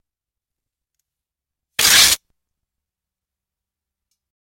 Audio of a copy of a Civil War saber being drawn from the metal scabbard. May require some trimming and buffing. I think I recorded this with an AKG Perception 200 using Cool Edit -- and I did it to get my room-mate to leave (after we recorded the sounds of several of his guns and a sword .
being, scabbard, sword, from, drawn